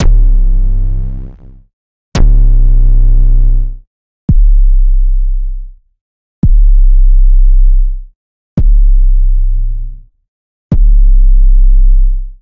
Triangle Kick in C [Mono]
I had used FL Studio 11's 3xOsc to make these. In the piano roll I used the note slider and note properties (like Cutoff, velocity, and Resonance) to modify each body of the kicks. They're all in C so there shouldn't be any problems in throwing it into a sampler and using it. BE SURE to msg me in any song you use these in. :D
808, 808-kick, bass, detune, distorted, free, hard, heavy, joji, kick, lil-pump, mafia, malone, post, post-malone, sample, sub, sub-bass, trap, xxxtencion, xxxtension